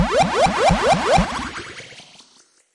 Some Points
dj, fx